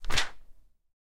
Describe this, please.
34/36 of Various Book manipulations... Page turns, Book closes, Page